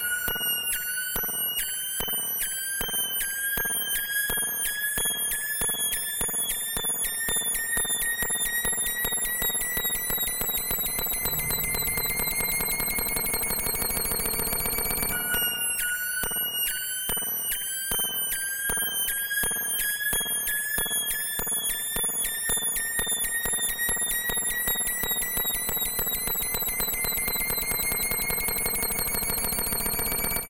VCS3 Sound 4
Sounds made with the legendary VCS3 synthesizer in the Lindblad Studio at Gothenborg Academy of Music and Drama, 2011.11.06.
The character of the sound is something between a bouncing ball and a Kraftwerkish bird.